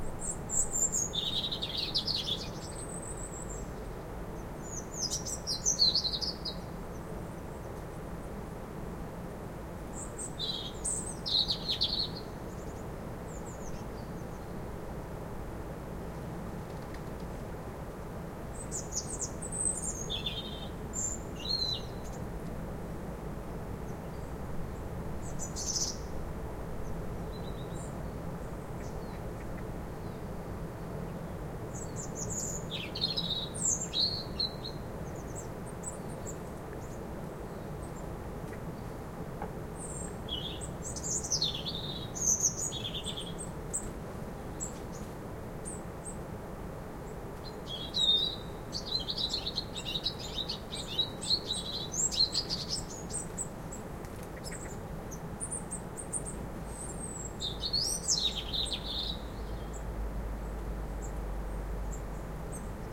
First recording I did with an Olympus LS-11 recorder. Not much difference to the LS-10.
Robin in Perthshire / Scotland.